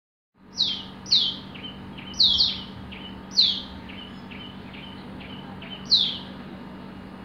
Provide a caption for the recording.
Morning birds
Morning recording of birds
birds, morning, nature